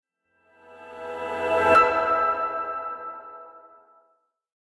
Cyber Swoosh 03
A sound that is ideal for video transitions. Made using the program Ableton Live.
Transition, swish, woosh, sfx, sound, swoosh